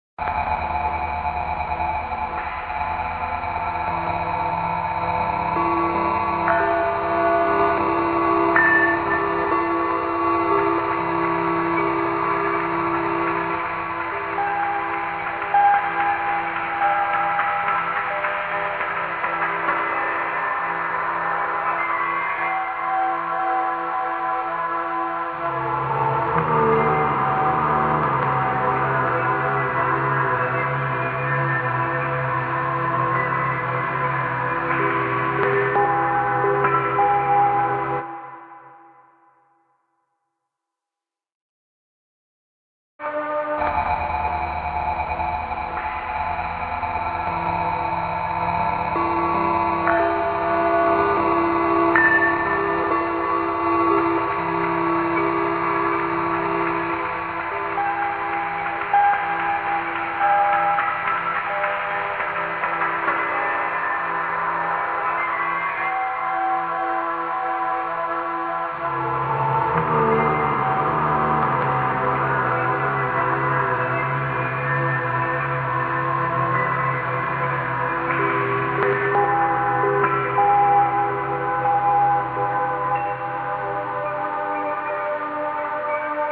Bell Train
This sound is from a collection of Sound FX I created called Sounds from the Strange. These sounds were created using various efx processors such as Vocoders, Automatic filtering, Reverb, Delay and more. They are very different, weird, obscure and unique. They can be used in a wide variety of visual settings. Great for Horror Scenes, Nature, and Science Documentaries.
aggressive, big, bright, calm, chaotic, confused, dark, Different, discovery, disturbing, Efx, FX, Nature, Sound, Soundtrack, Space, Strange, Transformational, Ufo, Unique, Universe, Weird